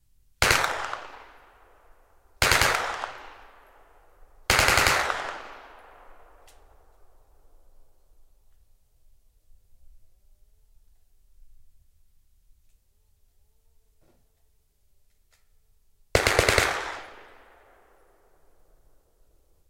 Stengun near 1
Near record of World War II machinegun Sten. Recorded with six microphones, mix in one track. Recorded with Fostex ADAT at firerange.
World, Sten, gun, machinegun, War, WWII